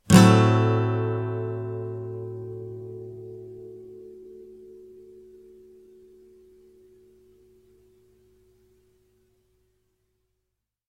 yamaha Am6
Yamaha acoustic guitar strum with medium metal pick. Barely processed in Cool Edit 96. First batch of A chords. Filename indicates chord.
sample, yamaha, minor